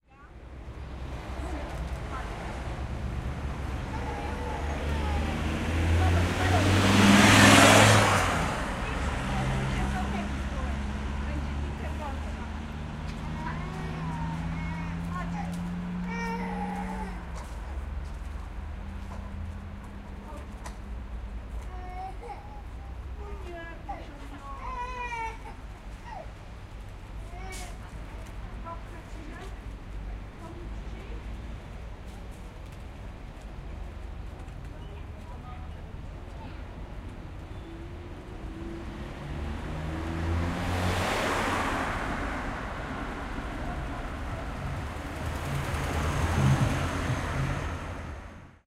08092014 Torzym warszawska street
Fieldrecording made during field pilot reseach (Moving modernization
project conducted in the Department of Ethnology and Cultural
Anthropology at Adam Mickiewicz University in Poznan by Agata Stanisz and Waldemar Kuligowski). Ambience of Warszawska St. in the center of Torzym (Lubusz). Recordist: Robert Rydzewski, editor: Agata Stanisz. Recoder: zoom h4n + shotgun
cars, fieldrecording, lubusz, noise, poland, road, street, torzym, traffic, truck